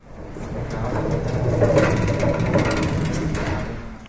The sound of a moving metal cart. Recorded with a tape recorder in the library / CRAI Pompeu Fabra University
campus-upf, cart, library, UPF-CS14, wheel